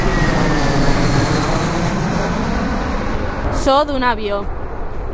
This sound is a plane that is arriving at the airport of El Prat de Llobregat, near the Delta of Llobregat. Recorded with a Zoom H1 recorder.
airplane, airport, avion, Deltasona, elprat